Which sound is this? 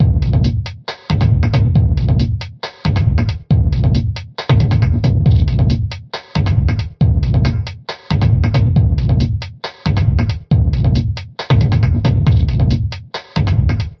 cloudcycle.chemtrails-drumloop.1-137bpm
drum loop - 137 bpm
beat, drum-loop, loop